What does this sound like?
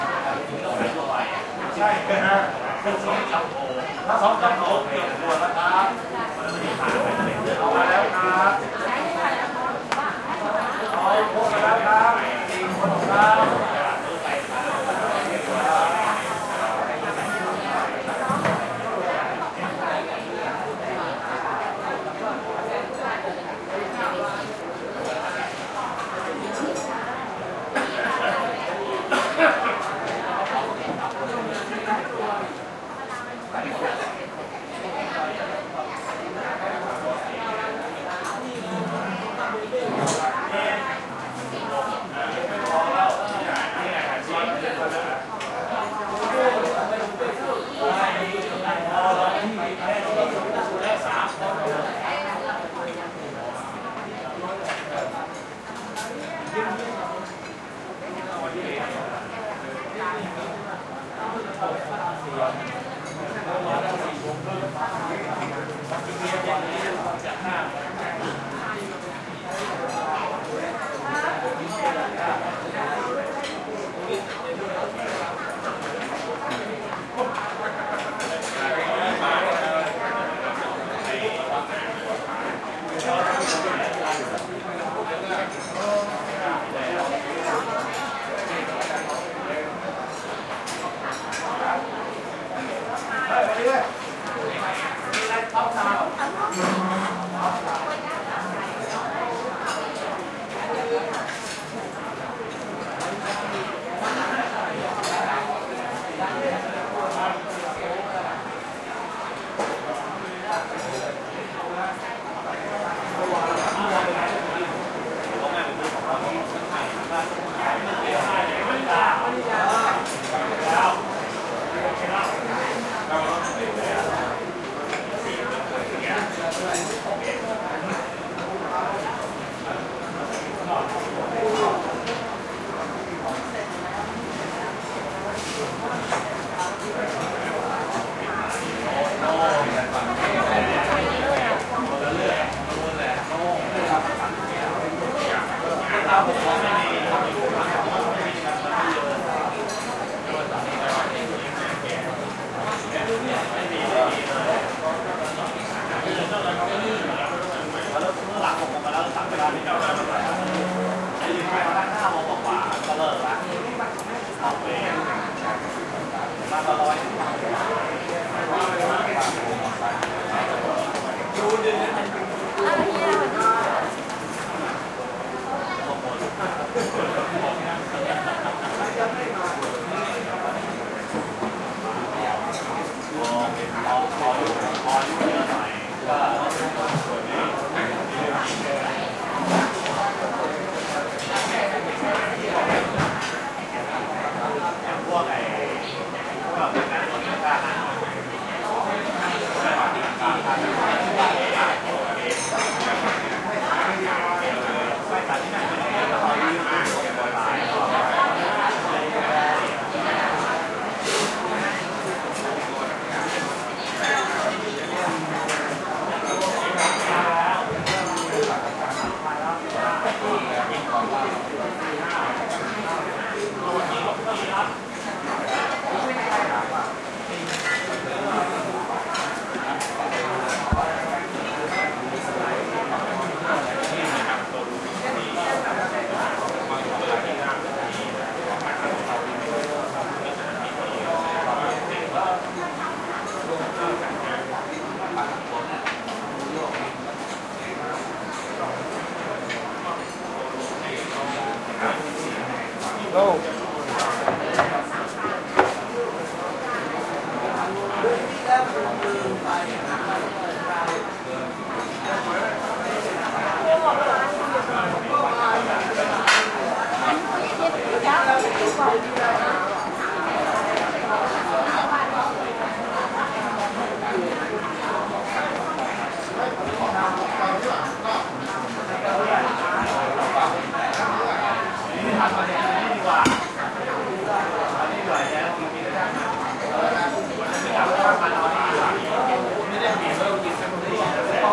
Thailand Bangkok chinese restaurant busy heavy air conditioner tone +bg traffic
Bangkok, busy, chinese, field, heavy, recording, restaurant, Thailand